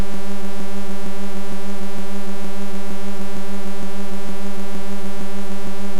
2osc SuperSaw
Sample I using a Monotron.